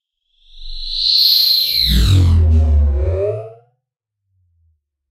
BOOMY, SWISHING FLY-BY. Outer world sound effect produced using the excellent 'KtGranulator' vst effect by Koen of smartelectronix.